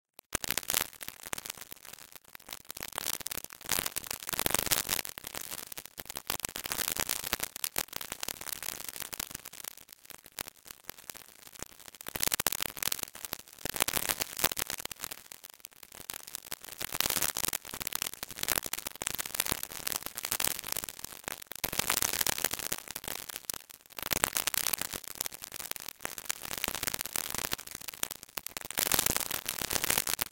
Electrified granules v2
Synthesized granular noise.
charge, crack, current, dots, electric, electricity, Granules, itching, noise, particles, spark, tesla, voltage, volts